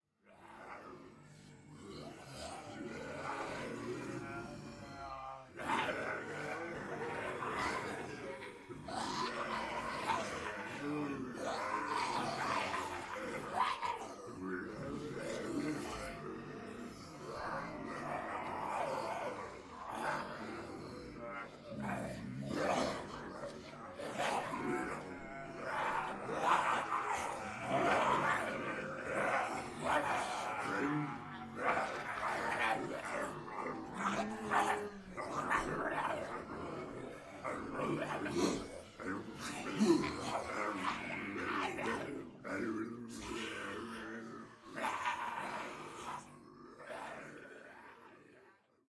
Zombie Group 2B
Multiple people pretending to be zombies, uneffected.
ensemble, undead, voice